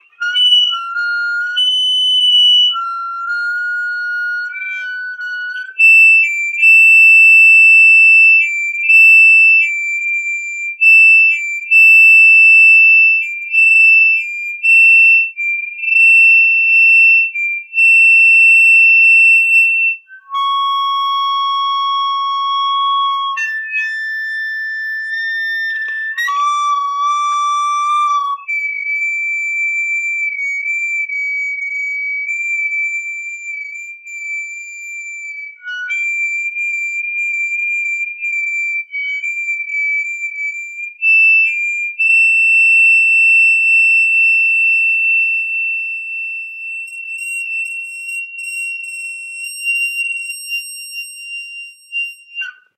Hearing Aid type feedback generated by a small Radio Shack amp & speaker and a cheap mic.
Recorded for use in the play "House of Blue Leaves" by John Guare.